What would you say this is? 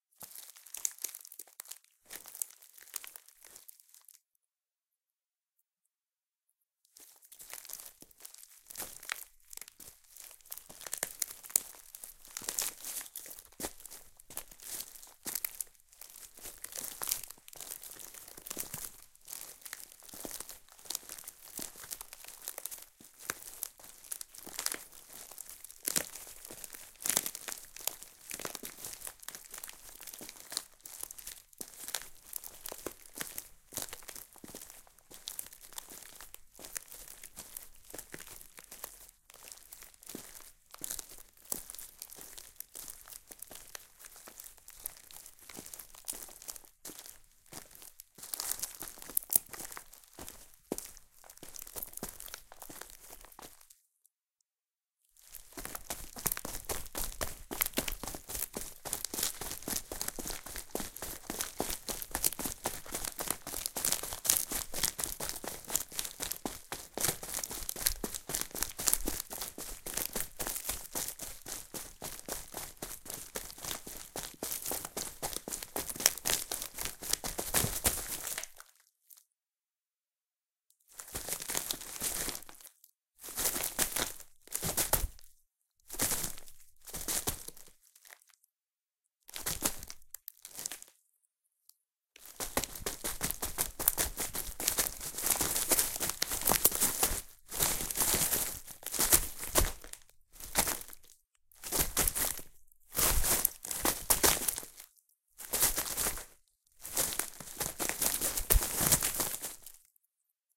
recorded with Olympus DM-550, walking on pieces of bark with shoes